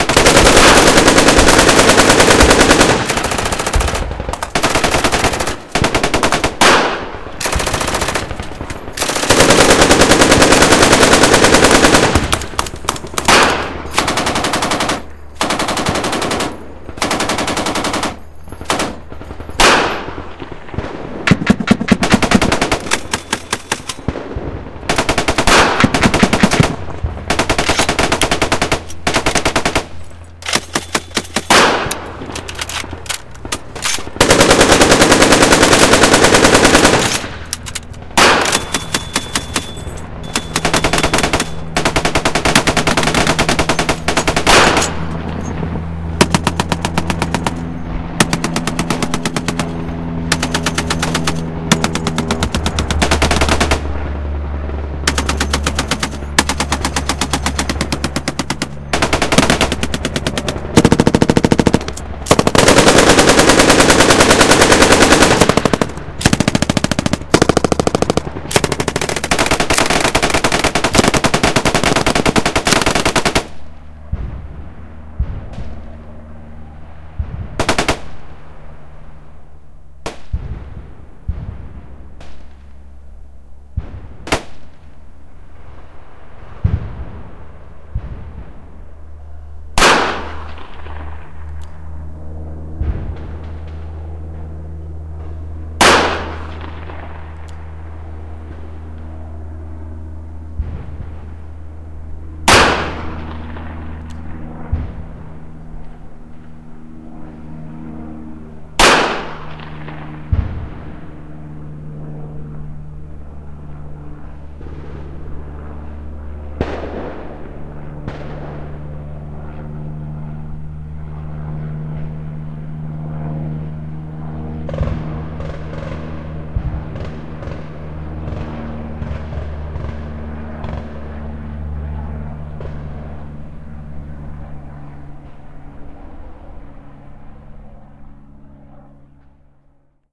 This is a file that uses multiple files from this website put into one. I wanted to make a semi realistic gunfight and found a few clips to use.
There is a lot of gunfire in the beginning. A quarter or halfway through the audio, the gunfire peters out to a few weapons and background noise firing with the occasional sniper fire.
explosion, mix, battle, war, gunfire, audacity